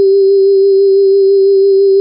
Generated from an FM-based software sound generator I wrote. Great for use with a sample player or in looping software.